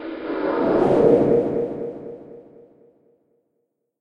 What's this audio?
Well I woke up and decided, why the heck not? Useful for some kind of fly by
Recorded with an INSIGNIA Microphone by making a fart sound and editing it in Audacity.